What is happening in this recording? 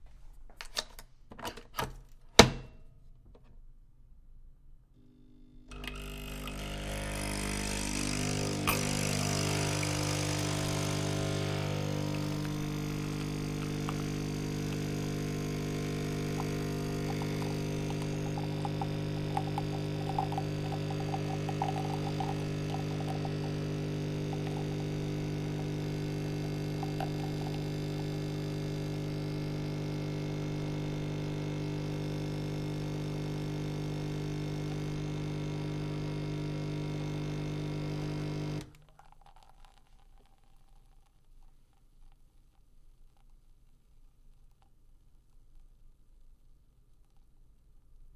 Espresso Coffee Machine